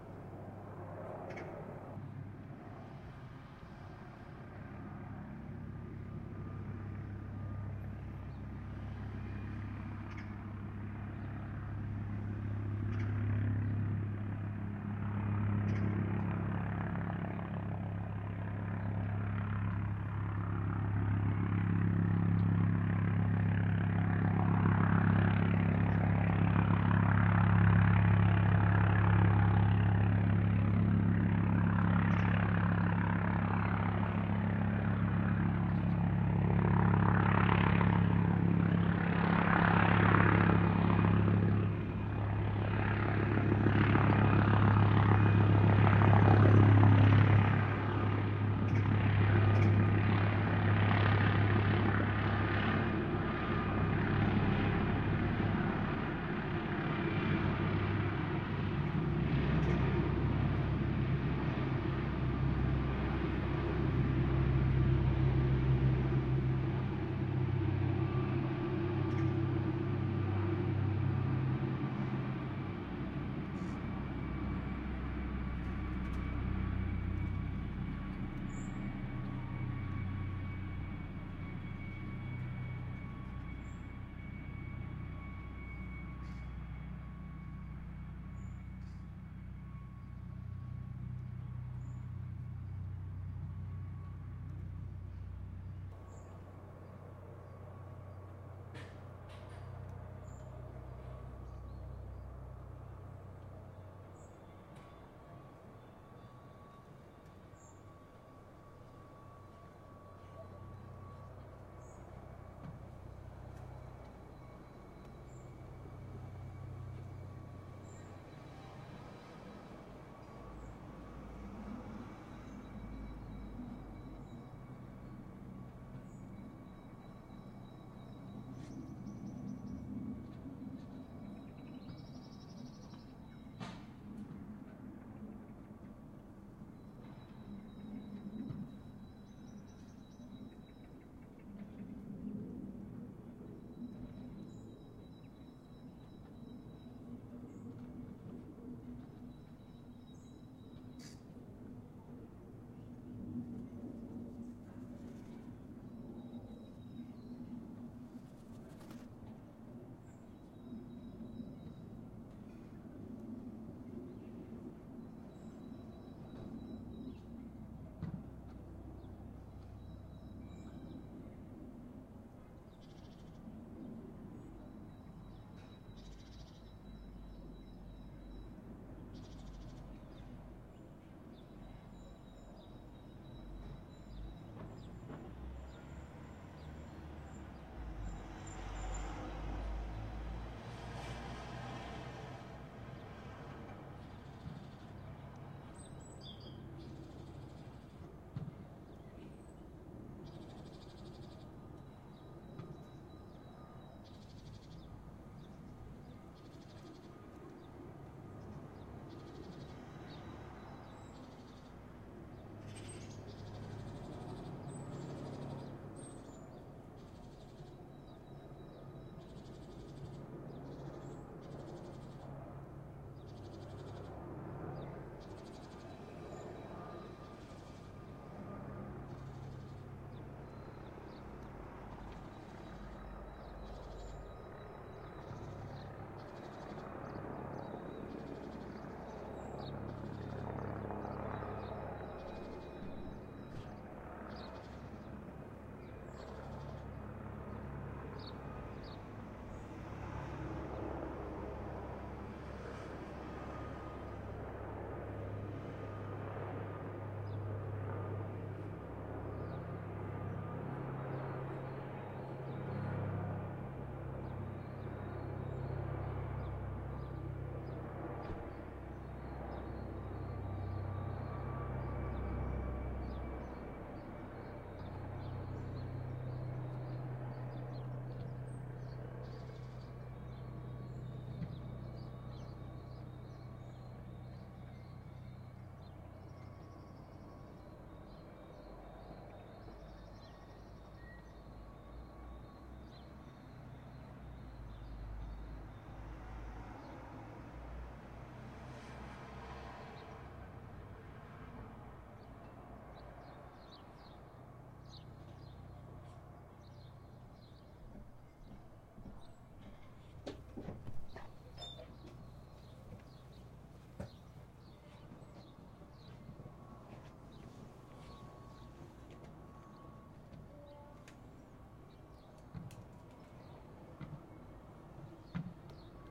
Helicopter - Mc Donnell Douglas 520N
Helicopter flying Mc Donnell Douglas 520N flying